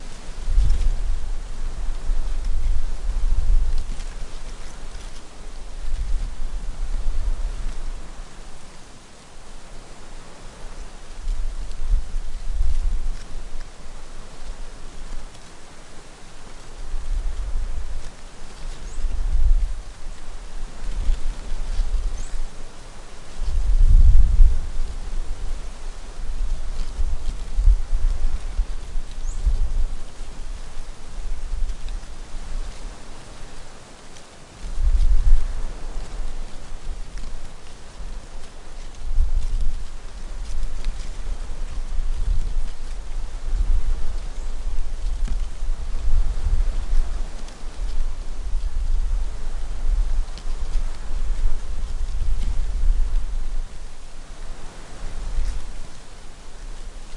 Recorded near Pattaya beach far away from Pattaya City with a cheap condenser conference microphone.
Beach, Nature, Trees, Waves, Wind